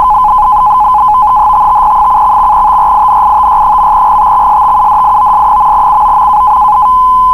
Amtor Navtex
The Amtor FEC - Navtex data mode. Recorded straight from an encoder. May be useful, who knows :) - Need any other ham data modes?
data, navtex, rtty